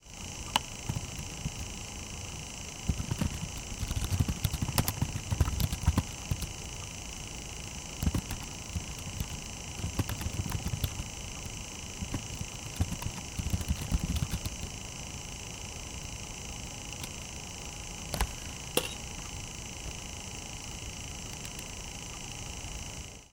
Mac iBook G3's cdrom working and producing various sound including some air noise from the spinning CD. This include a loud typing sound and some mouse clicks on the keyboard. Recorded very close to the cdrom with Rode NT1000 condensor microphone through TLAudio Fat2 tube preamp through RME Hammerfall DSP audio interface.